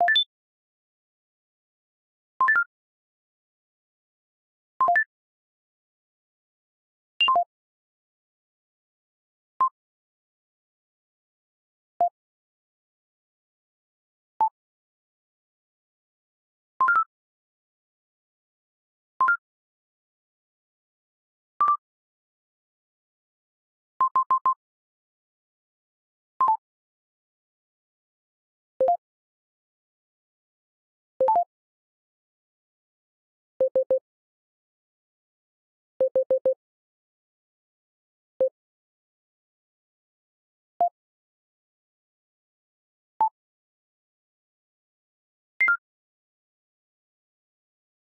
Lots of blips and bloops for sci-fi themed stuff.
blips, bloops, sci-fi, space